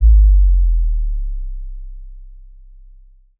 Sub Rush 1
design, boom, low, dark, rush, sub, tuned, sample, cinematic, bass, big, sound, wobble